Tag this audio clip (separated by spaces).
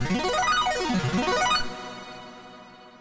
lottery win success